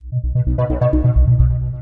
simulacion de sinte 303